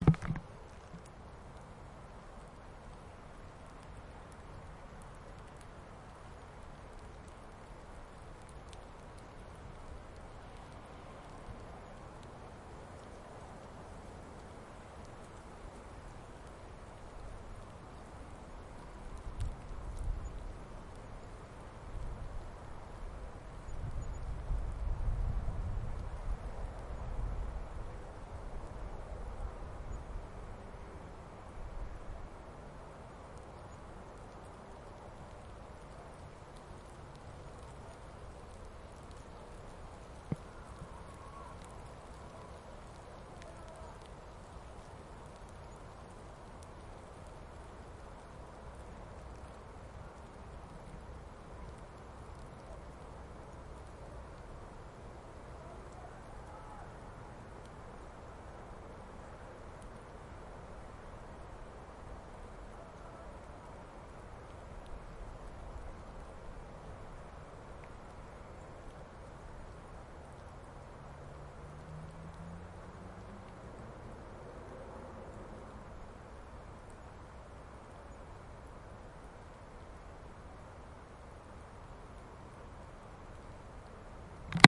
Near city
City hum recorded from the nearby forest
alone, city, distance, distant, forest, hum, lonely, night, rain, wet